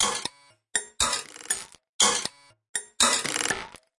PlinkerPercussion 120bpm01 LoopCache AbstractPercussion
Abstract Percussion Loop made from field recorded found sounds
Loop, Percussion